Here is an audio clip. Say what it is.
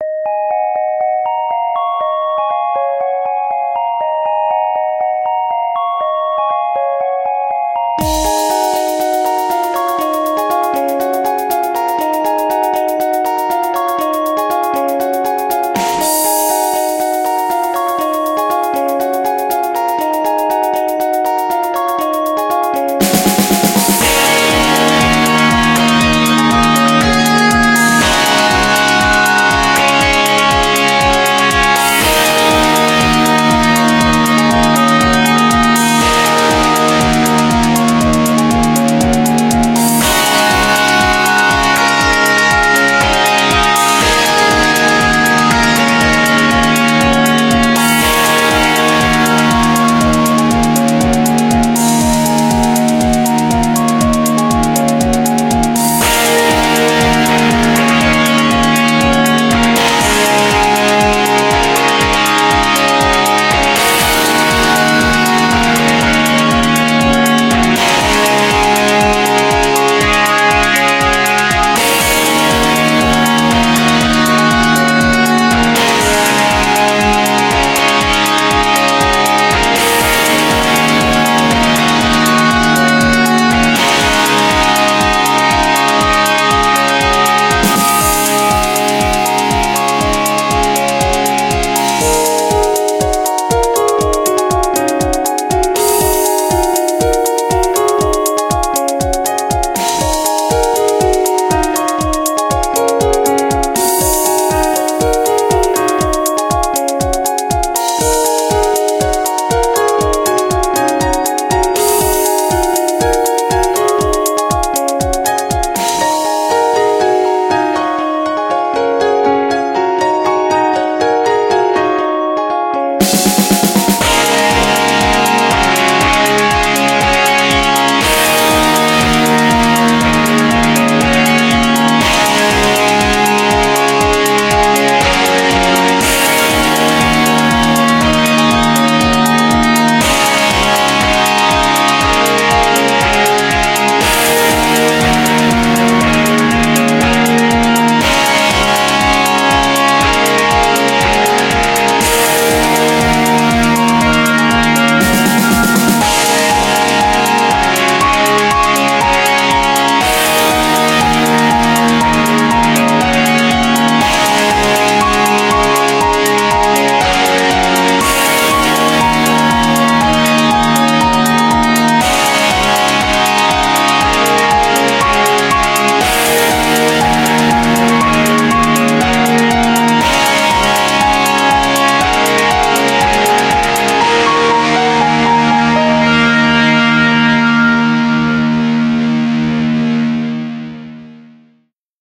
Run Under The Sun
One of my older tracks from 2009 :) It's a 3-minute long inspirational experience showing the feeling of someone running to their dream!
action, dynamic, easy, fast, inspiration, light, race, rock, under, walk